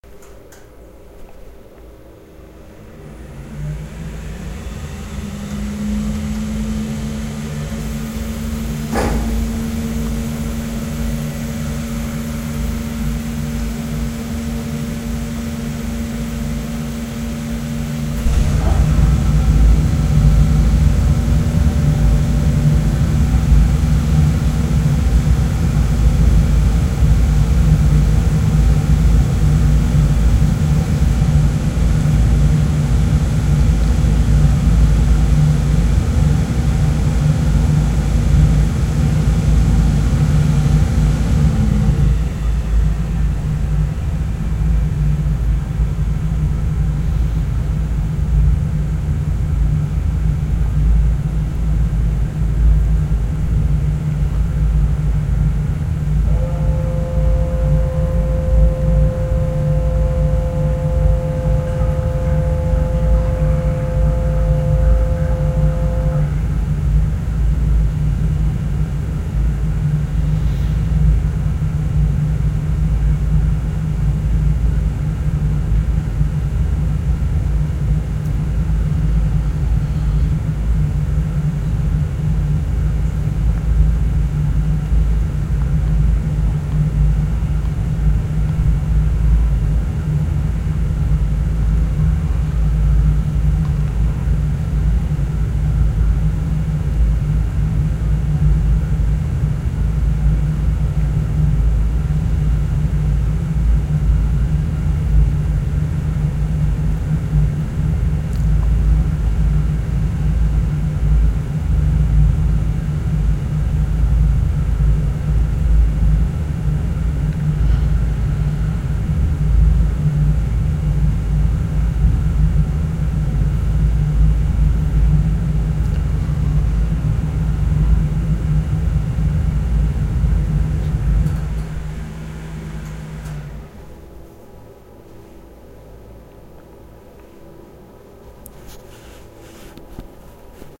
This is a Weishaupt WTC-35 Oil burner. It switches on, warms the boyler and switches off again. Enjoy!